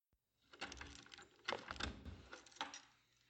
locking door
door, lock, locking